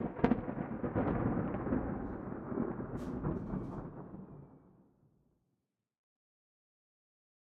balfron thunder M
Field-recording Thunder London England.
21st floor of balfron tower easter 2011
England, Field-recording, London, Thunder